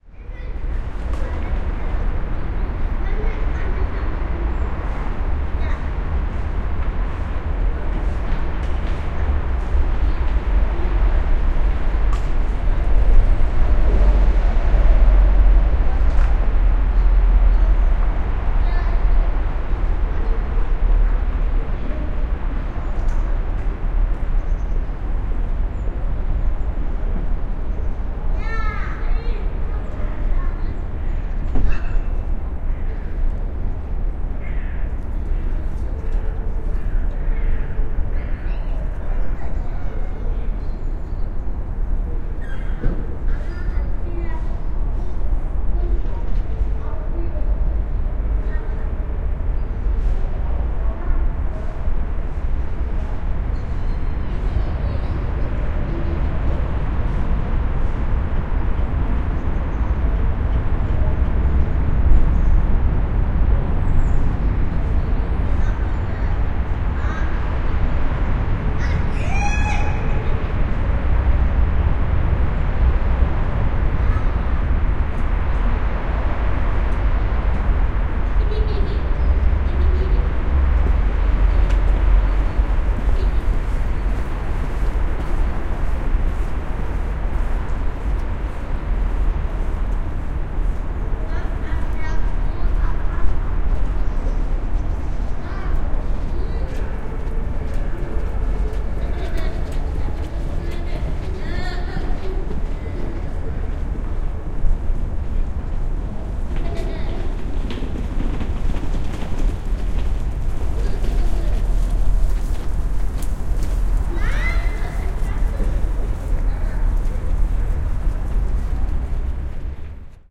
Stadt - Winter, Morgen, Straße
Urban ambience recorded in winter, in the morning hours on a street in Berlin
ambience, Berlin, field-recording, morning, street, winter